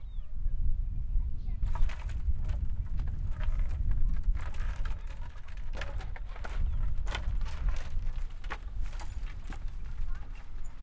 This was recorded with an H6 Zoom recorder at Zita park as I walk across a bridge connecting two jungle gyms at a slow pace with the shaking of the planks and jangling of the chains.